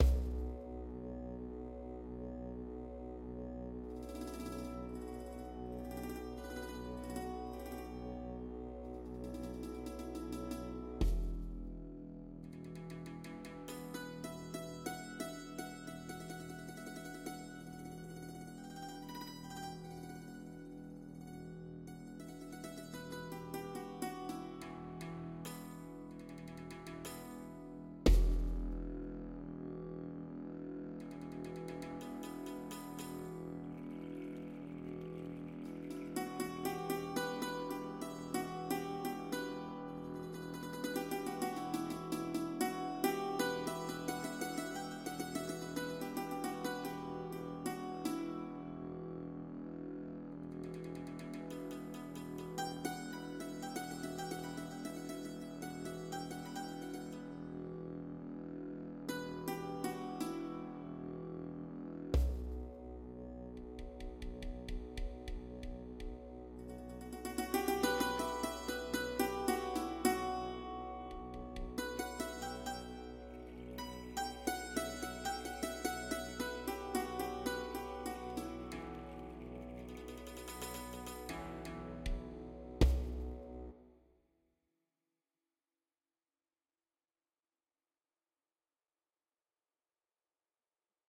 etnico, recorded with roland hpd 20, any samples, in live